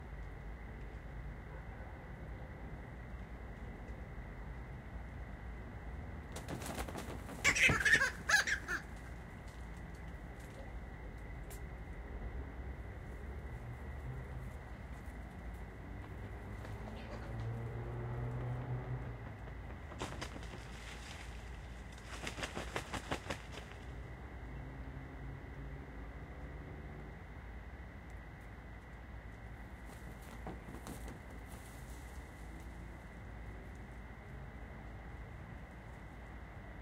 Close-by recording of bats settling into trees late at night, with the sounds of cars and cicadas in the background.
Recorded using a BP4025 microphone and ZOOM F6 floating-point recorder.